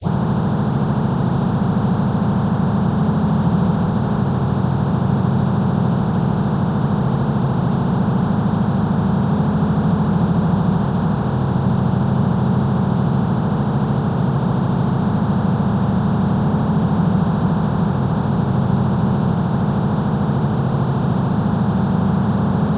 granular ambience 1 generator
This is the first in a series of soundscapes with imitations of real objects created entirely with software. No processing applied. This is a generator.
free, sound